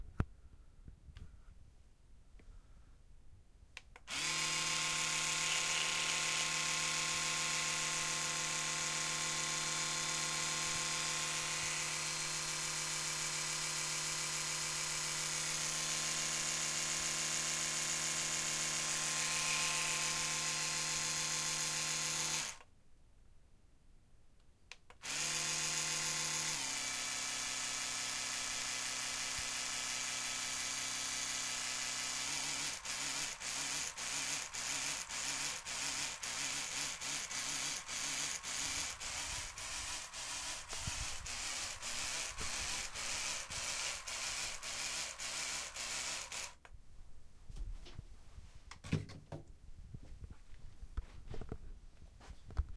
Recording of an electric toothbrush on multiple settings: fast, slow, pulse.
Done with an H2
buzz, can-opener, grinding, machine, mechanical, motor, toothbrush